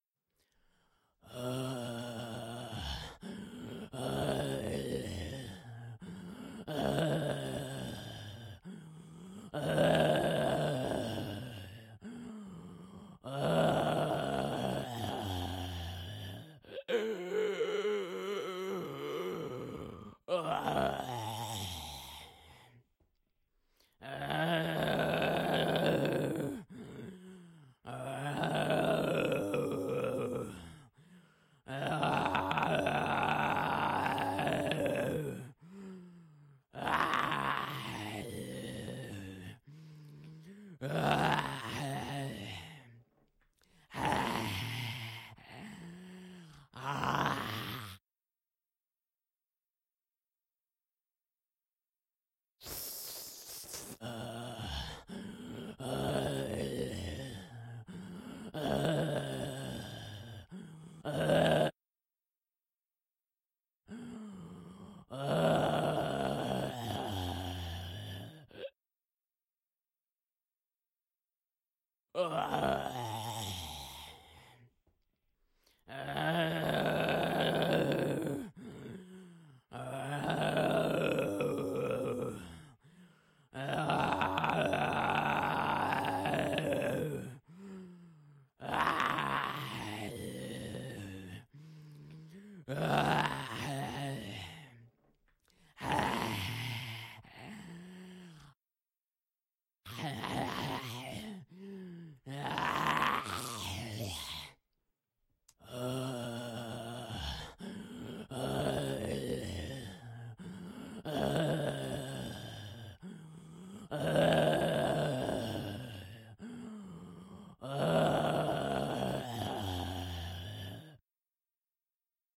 Single groaning zombie. Syncs at 08.24.14.